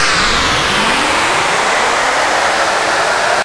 Want 100% pure hardstyle screeches then this is the stuff you want. Fear this is just a mild description of this screech. Will make most people go nuts on the dancefloor.